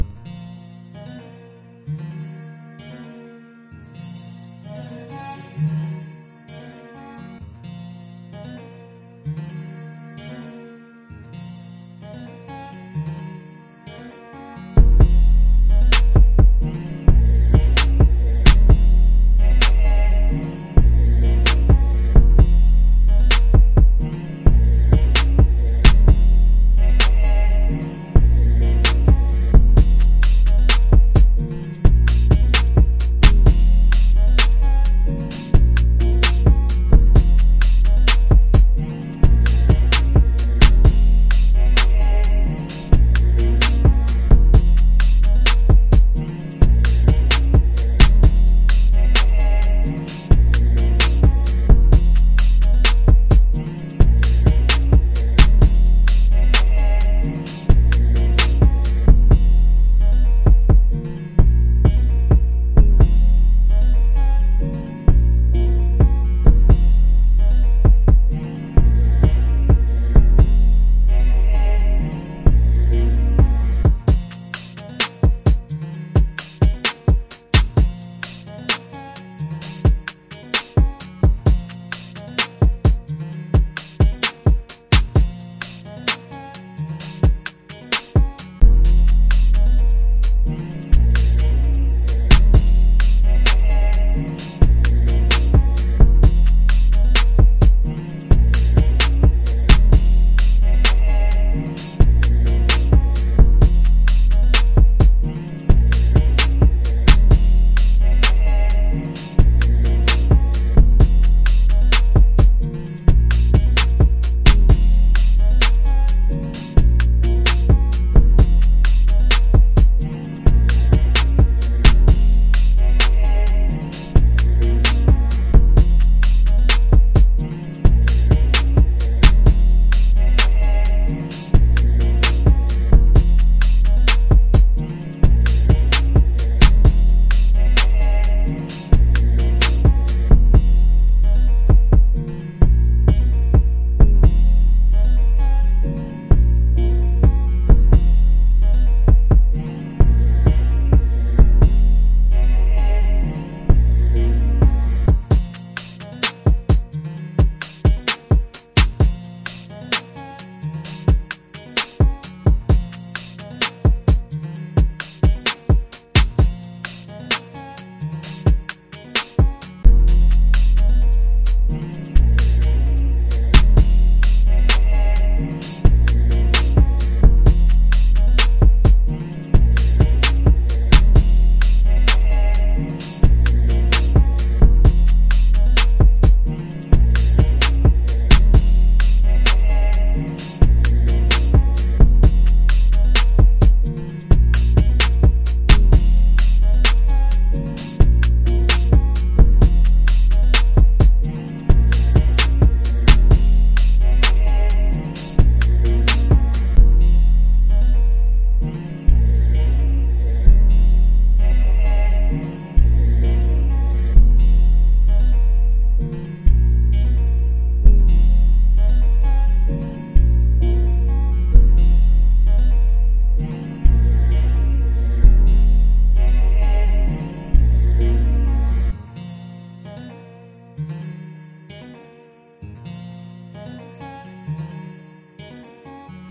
Pain Killers (prod by Flames260)
this is a loop beat made by me hope you enjoy listening just as much as i did creating it
atmosphere, bass, instrumental, kicks